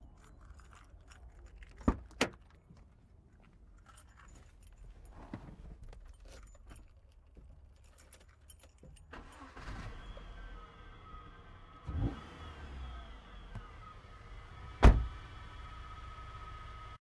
Car Door Open Ignition Star
A recording of me opening my car door, getting in, starting the engine and closing the door.
Recorded on a Tascam DR100 portable recorder.